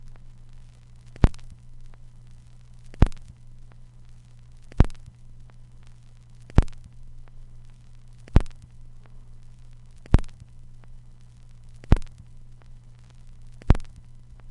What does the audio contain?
vinyl endoftherecord
A collection of stereo recordings of various vintage vinyl records. Some are long looping sequences, some are a few samples long for impulse response reverb or cabinet emulators uses. Rendered directly to disk from turntable.
noise, record